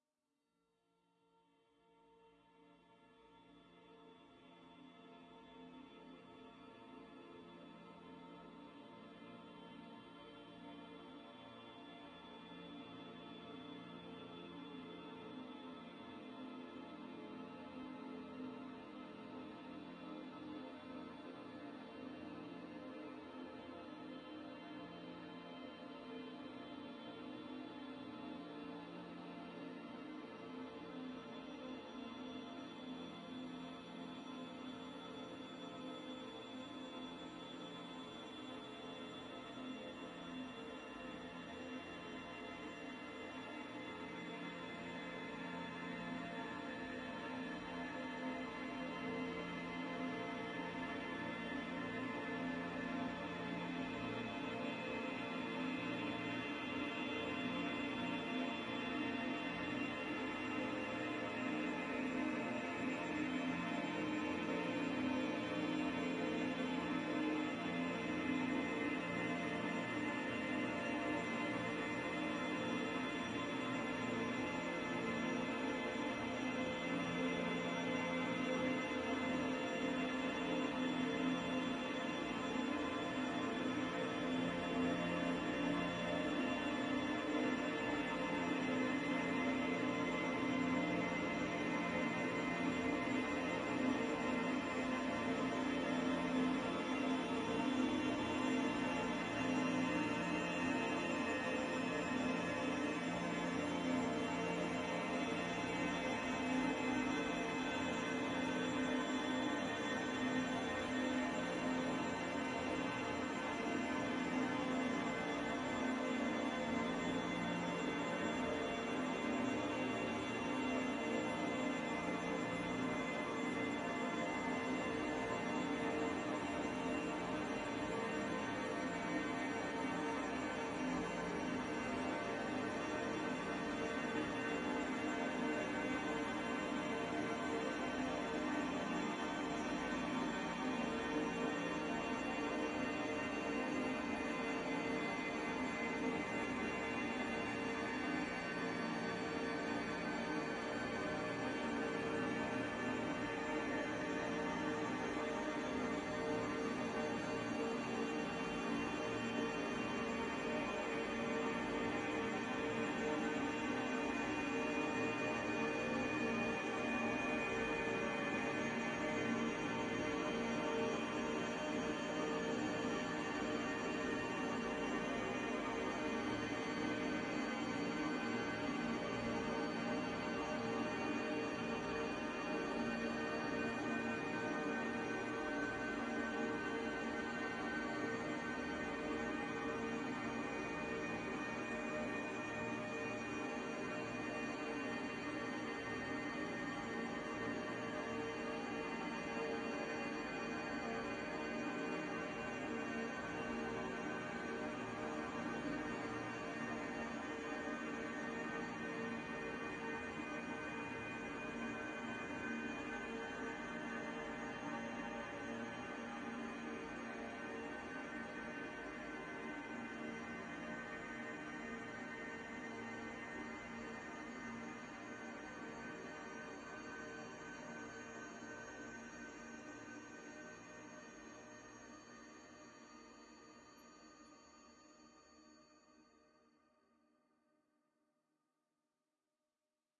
LAYERS 019 - ALCHEMIC DREAM DRONE-127
LAYERS 019 - ALCHEMIC DREAM DRONE was created using Camel Audio's magnificent Alchemy Synth and Voxengo's Pristine Space convolution reverb. I used some recordings made last year (2009) during the last weekend of June when I spent the weekend with my family in the region of Beauraing in the Ardennes in Belgium. We went to listen to an open air concert of hunting horns and I was permitted to record some of this impressive concert on my Zoom H4 recorder. I loaded a short one of these recordings within Alchemy and stretched it quite a bit using the granular synthesizing method and convoluted it with Pristine Space using another recording made during that same concert. The result is a dreamscape drone. I sampled every key of the keyboard, so in total there are 128 samples in this package. Very suitable for soundtracks or installations.